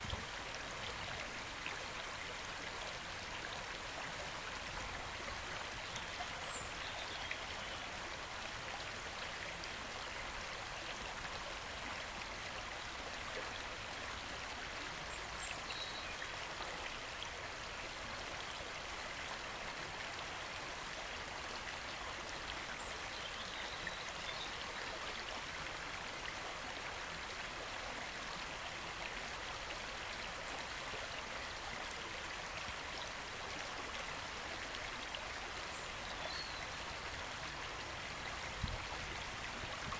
Burbling stream birds in background
Recording of small stream at Drummondreach Wood, Black Isle, Highlands, Scotland using a Zoom H2n with no special effects or filters afterwards.
ambiance, birds, Burbling-stream, field-recording, nature, spring